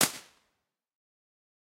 Cornish Paddock IR
Impulse response recorded in a Cornish paddock meters from the megalithic portal of the Hendraburnick Quoits. I can hear the ley lines now.
Cornish Paddock
Impusle-response, open-spaceIR, processing